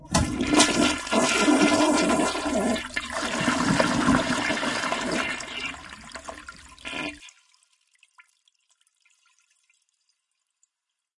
This is a friend's toilet, recorded in March 2008 from Nashville, Tennessee, United States. The valve supplying water was turned off. I used a Zoom h4 and a set of Cad M179 studio condenser microphones.